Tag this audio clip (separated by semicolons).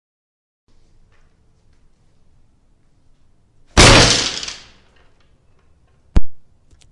HitTable; Hit; Violent; Anger; Fury; Table; Violence